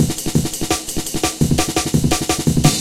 A mangled Amen breakbeat